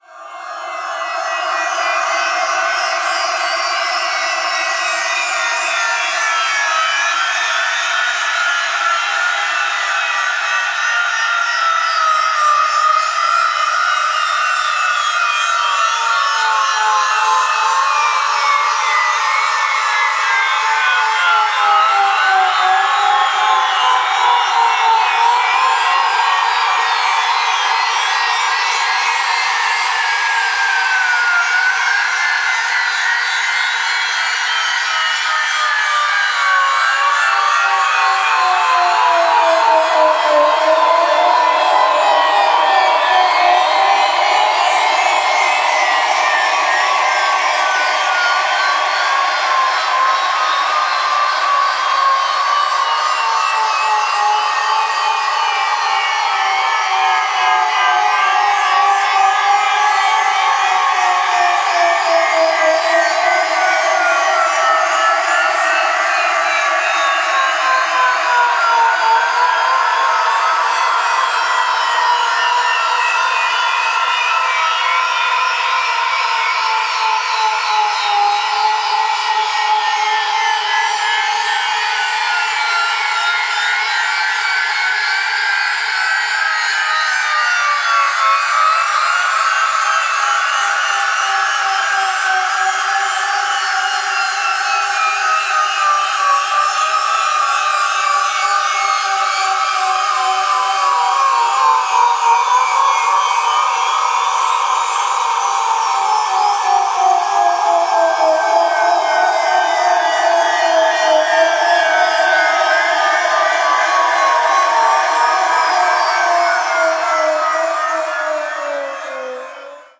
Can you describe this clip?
This sample is part of the "Space Machine" sample pack. 2 minutes of pure ambient deep space atmosphere. A robotic crowd of science fiction weirdness. Difficult to describe. Quite experimental and dense.
ambient, drone, experimental, reaktor, soundscape, space